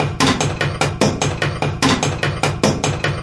.SONY DICTAPHONE : sampling incongruous objects in collision,creation of sample.
.ADOBE AUDITION : reduction of noise of the sample.
.RECYCLE software : isolation of elements of sample for creation of soundkit.
.SOUNDFORGE 7 : creation final of drumloop (cut....).
beat, beats, field-recordings, loop, recordings, field, drums, drumloop, drumloops, sampling